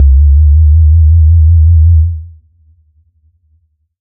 Spooky whistle E1
This sample is part of the "Basic triangle wave 1" sample pack. It is a
multisample to import into your favorite sampler. It is a spooky
whistling sound with quite some vibrato on the pitch and some reverb
tail. In the sample pack there are 16 samples evenly spread across 5
octaves (C1 till C6). The note in the sample name (C, E or G#) does
indicate the pitch of the sound. The sound was created with a Theremin
emulation ensemble from the user library of Reaktor. After that normalizing and fades were applied within Cubase SX.
horror, multisample, reaktor, whistle